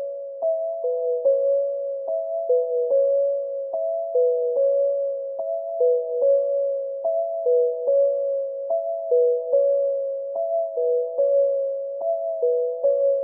Lofi Bells - 145bpm - C#min
lofi, hip, chill, cool, hop, mallet, bells, smooth